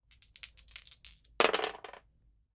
two dice being rolled onto a wooden table top